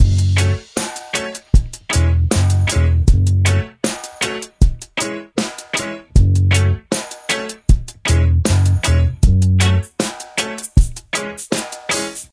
13 main mix L
Modern Roots Reggae 13 078 Gbmin Samples
Reggae, 078, Samples, Modern, Gbmin, 13, Roots